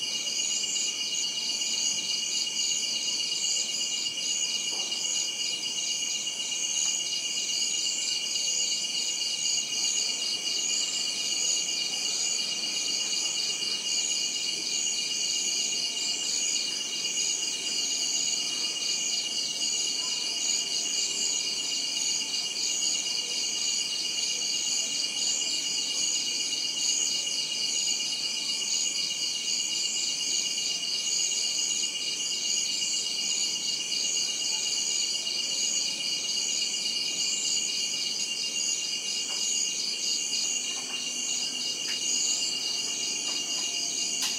Night/Evening Ambience in Chiang Dao, Thailand
Evening recording outside my bungalow in North Thailand.
ambiance, chiang-dao, crickets, evening, field-recording, nature, night, southeast-asia, thailand